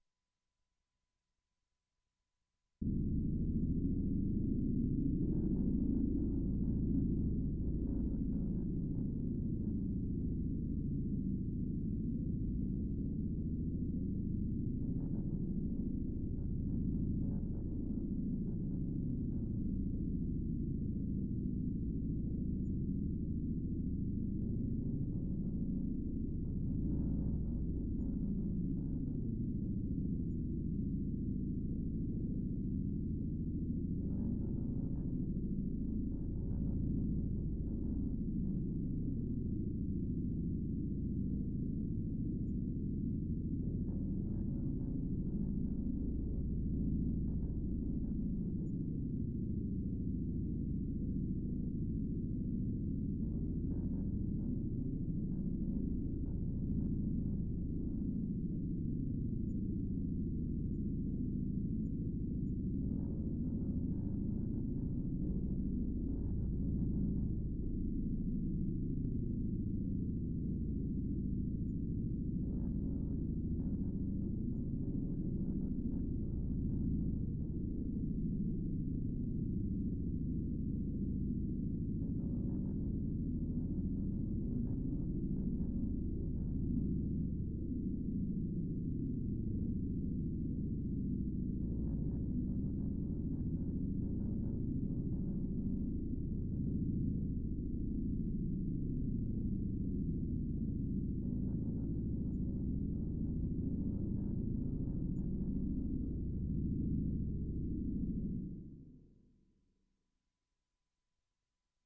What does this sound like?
sh Machine Talk 1
Developed for use as background, low-level sound in science fiction interior scenes. M-Audio Venom synthesizer. Cyclical rumble plus random, subtle machine "communication".
ambiance, futuristic, machine, M-Audio-Venom, science-fiction, synthesized